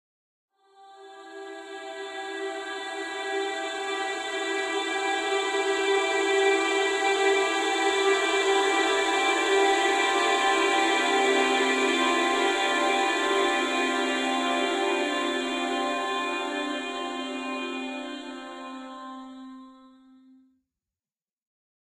An ethereal sound made by processing a acoustic & synthetic sounds.
atmospheric, blurred, emotion, ethereal, floating